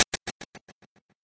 2nd set of impulse responses created in Cool Edit 96 with the "echo", "delay", "echo chamber", and "reverb" effect presets. I created a quick burst of white noise and then applied the effects. I normalized them under 0db so you may want to normalize hotter if you want.